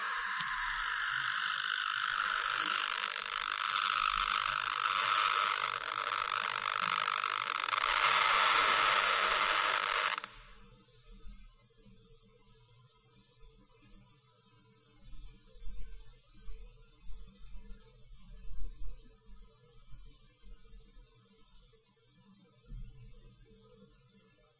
An air ionizer working.
Recorded by Sony Xperia C5305.